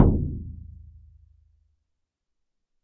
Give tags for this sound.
sticks
percs
shamanic
hand
percussive
drums
bodhran
drum
percussion
shaman
frame

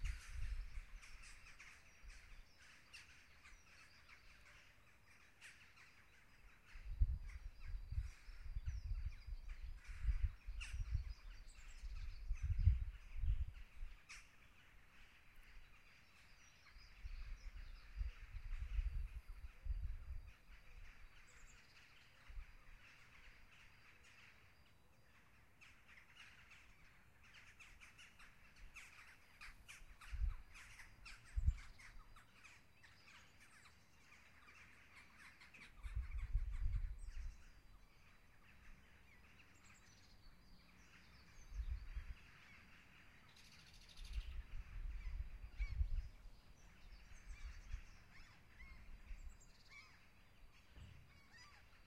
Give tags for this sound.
birds
flock
forest

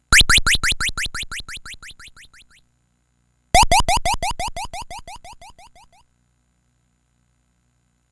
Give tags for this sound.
Soundeffects
Atari

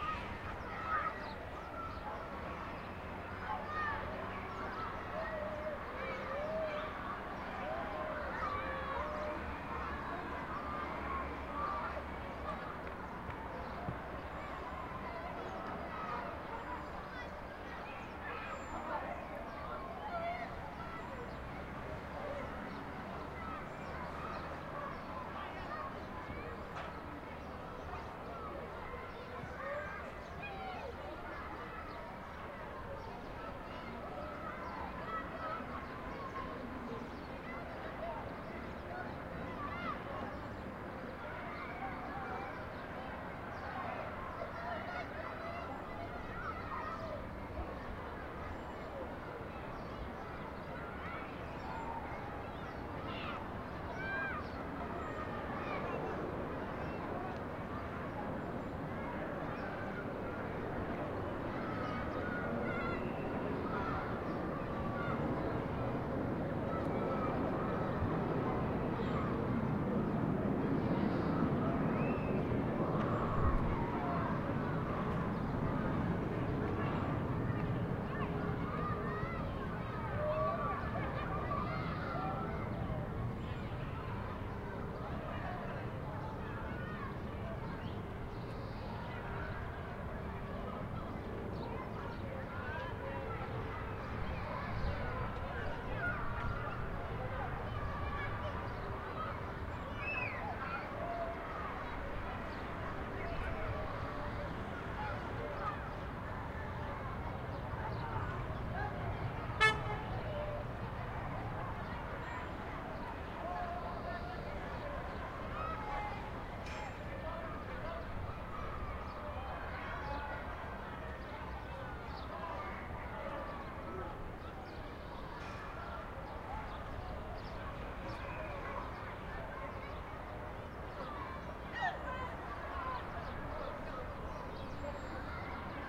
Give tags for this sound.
Ambience
lisbon
distant
EXT
kindergarten
subcity
xabregas
portugal
school
day

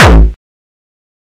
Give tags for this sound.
bass
beat
distorted
distortion
drum
drumloop
hard
hardcore
kick
kickdrum
melody
progression
synth
techno
trance

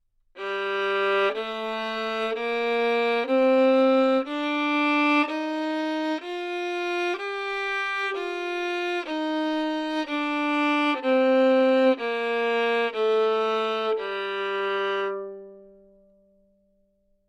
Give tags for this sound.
violin
neumann-U87
scale
Amajor
good-sounds

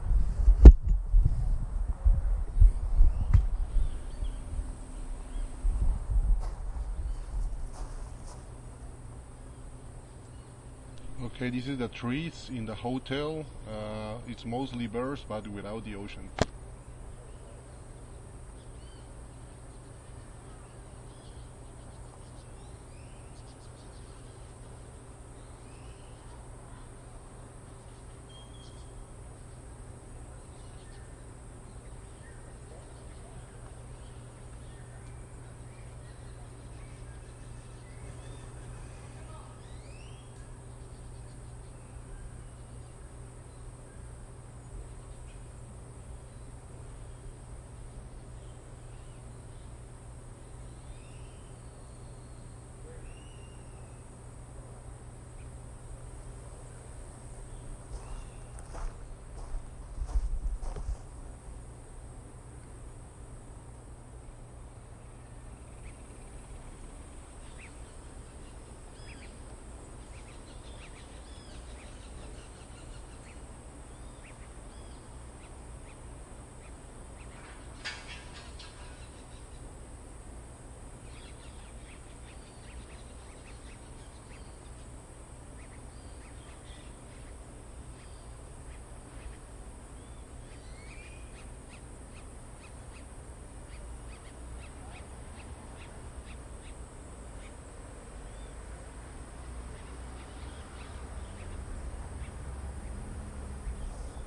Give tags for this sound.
trees
arboles
wind
ambiance
pradera
forrest
jungle
bosque
nature
amvient
pajaritos
field-recording
ambiente
birds
meadow
selva
viento

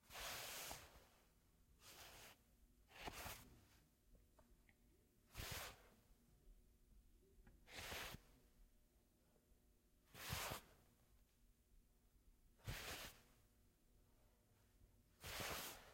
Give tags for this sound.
cloth
film
foley

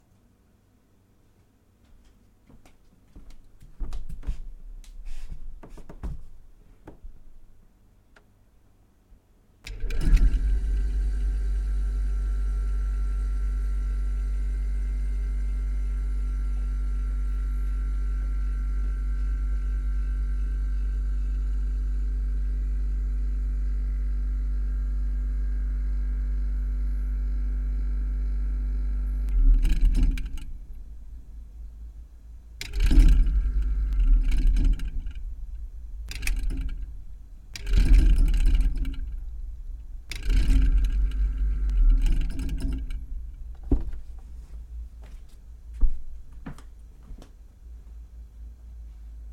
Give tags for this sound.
domestic
engine
fridge
house-recording
kitchen
motor
refrigerator

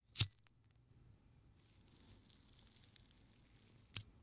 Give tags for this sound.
Click
ignite
Lighter

